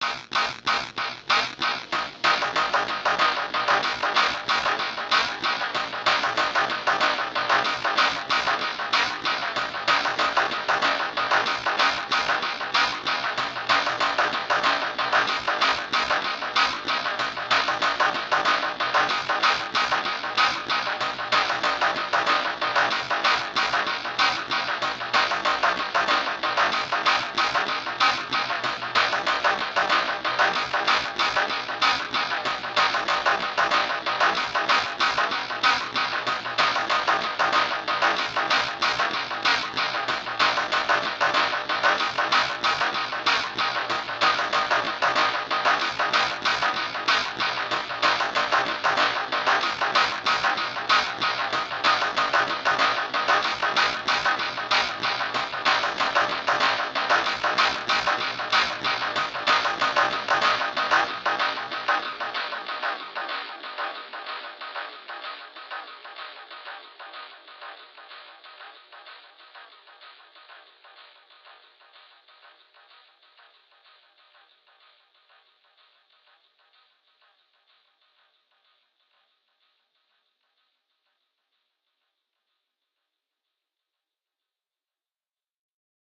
industrial clap delay
clap, delay, rythm, panning, ambience, ambient, loop, glitch, dance, clapping, techno, electro, electronic, rhytmic, sfx, expeimental, industrial, noise, pan, effect